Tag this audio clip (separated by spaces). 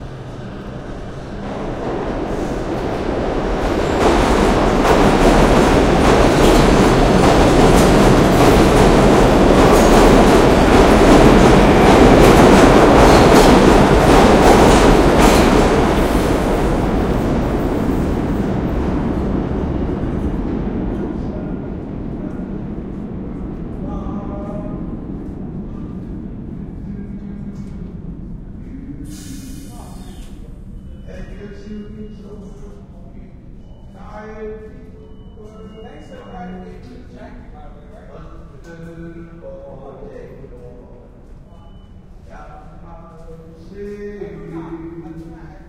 subway Zoom NYC